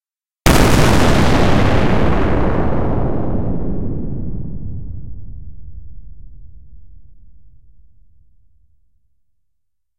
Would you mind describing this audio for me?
spaceship explosion1
made with vst intrument albino
atmosphere, battle, blast, energy, explosion, fighting, fire, firing, future, futuristic, fx, gun, impact, impulsion, laser, military, noise, rumble, sci-fi, shoot, shooter, shooting, soldier, sound-design, space, spaceship, torpedo, war, warfare, weapon